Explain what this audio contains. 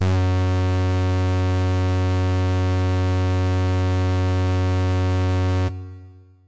Full Brass Fs2
The note F-sharp in octave 2. An FM synth brass patch created in AudioSauna.